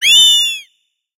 Moon Fauna - 118
Some synthetic animal vocalizations for you. Hop on your pitch bend wheel and make them even stranger. Distort them and freak out your neighbors.
alien, animal, creature, fauna, sci-fi, sfx, sound-effect, synthetic, vocalization